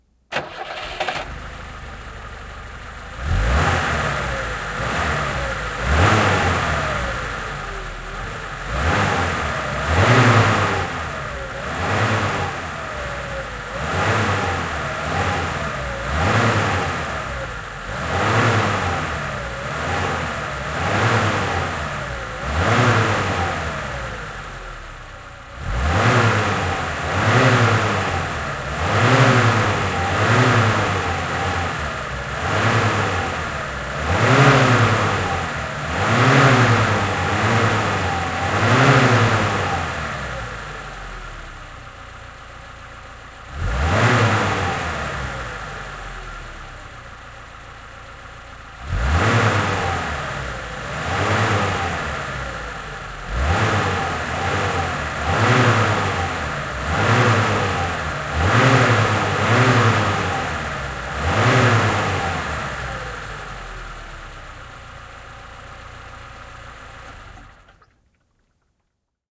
Henkilöauto, kaasutus / A car revving, Lada 1500 Combi, a 1981 model
Lada 1500 Combi, vm 1981. Käynnistys, kaasuttelua, moottori sammuu. Äänitetty auton edestä.
Paikka/Place: Suomi / Finland / Karkkila
Aika/Date: 03.10.1983
Auto, Yleisradio, Field-Recording, Autot, Autoilu, Finnish-Broadcasting-Company, Suomi, Tehosteet, Finland, Motoring, Soundfx, Yle, Cars